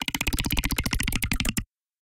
An alien lion sound created with Serum by Xfer Records.